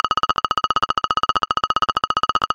RIGAUD Matthieu 2015 2016 CartoonQuietWalk
When a character of a cartoon must to be discreet, he makes a lot of little and fast footsteps. This sound can be used in this perspective.
Sound made entirely with Audacity. It can be repeated in a loop.
Production steps :
- Generate Click Track
Action choice : Generate track
Tempo : 300 beats/minute
Beats per measure : 1
Number of measures : 66
Individual click duration : 100 ms
Start time offset : 0 seconds
Click sound : tick
Noise click resonance : 20
MIDI pitch of strong click : 50
MIDI pitch of weak click : 51
- Effect change speed : Percent Change : 201,357
- Change Pitch from 1260 to 1498,401
Typologie de Schaeffer : X"
Morphologie
1 - Masse : Son seul complexe
2 - Timbre Harmonique : brillant
3 - Grain : rugueux
4 - Allure : présence d'un vibrato (comme un marteau piqueur)
5 - Dynamique : abrupte
6 - Profil mélodique : variation Scalaire
7 - Profil de masse : Fait pour être entendu parmi d'autres sons.